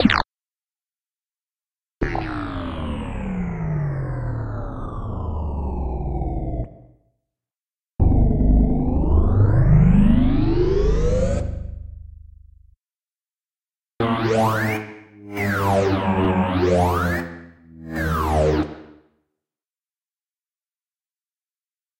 various spacecraft sounds/liftoff/landing/laser